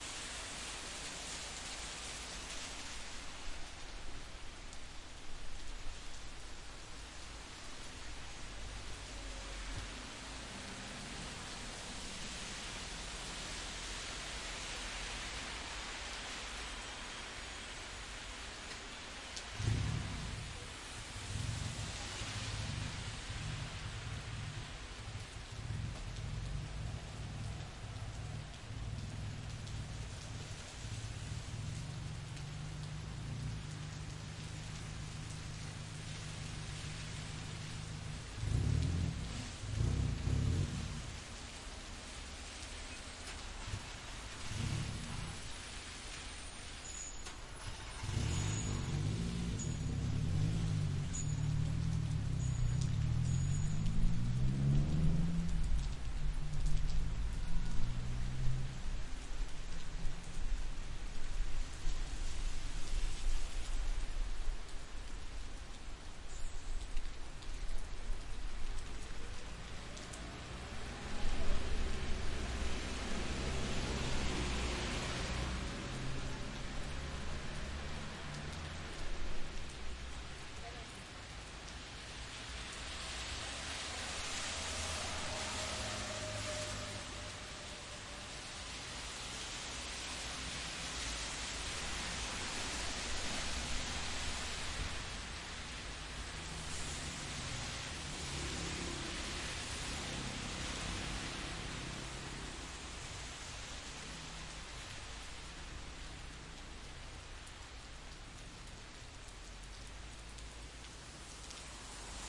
Rainy day ambient
Stereo ambience record in the city with rain. There are some cars and not so much people.